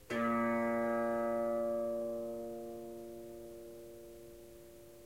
Tanpura note A sharp
Snippets from recordings of me playing the tanpura.
Tuned to C sharp, the notes from top to bottom are G sharp, A sharp, C sharp, Low C sharp.
In traditional Indian tuning the C sharp is the root note (first note in the scale) and referred to as Sa. The fifth note (G sharp in this scale) is referred to as Pa and the sixth note (A sharp) is Dha
The pack contains recordings of the more traditional Pa-sa-sa-sa type rythmns, as well as some experimenting with short bass lines, riffs and Slap Bass drones!
Before you say "A tanpura should not be played in such a way" please be comforted by the fact that this is not a traditional tanpura (and will never sound or be able to be played exactly like a traditional tanpura) It is part of the Swar Sangam, which combines the four drone strings of the tanpura with 15 harp strings. I am only playing the tanpura part in these recordings.
bass, ethnic, swar-sangam, tanbura, tanpura, tanpuri